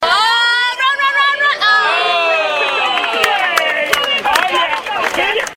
Crowd yells run run run and then disappointed awws.